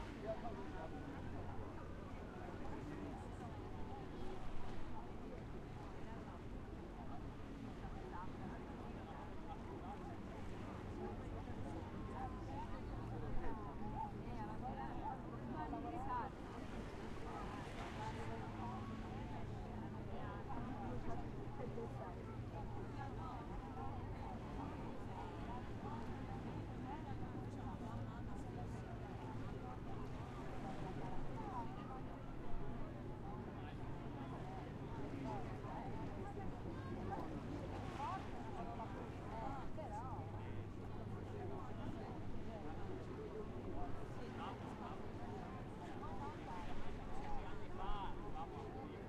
voices of many people chattering during a festival, event by the sea in Livorno, with shoreline sound from distance
background, chat, chattering, crowd, seashore, soundscape, voices